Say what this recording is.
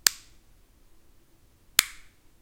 electric toothbrush switch
Pushing on and off a switch from an electric toothbrush. Very clicky. Recorded with AT4021s into a Modified Marantz PMD661.
button, toggle, switch, click, foley, sound-effect